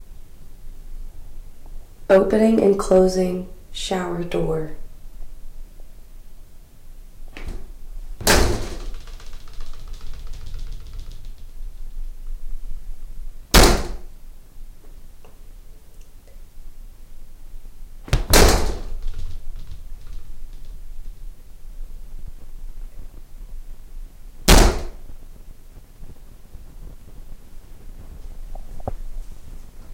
Opening and closing shower door
shower, open, opening, door, close